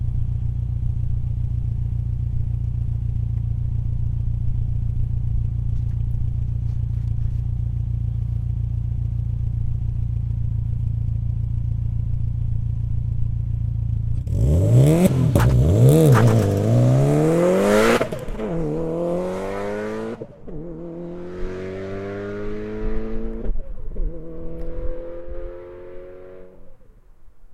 Subaru Impreza STI start from idling